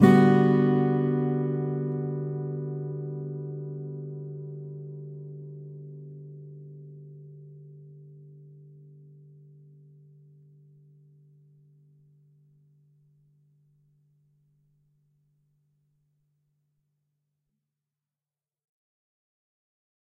Guitar, Acoustic, GMaj7 Chord
Raw audio of an acoustic guitar playing a G-major 7th chord (G-B-D-F#) with the strings left open to resonate until their natural decay. The recorder was about 30cm from the guitar.
An example of how you might credit is by putting this in the description/credits:
The sound was recorded using a "Zoom H6 (XY) recorder" on 13th June 2018.
7th, acoustic, chord, guitar, major, seventh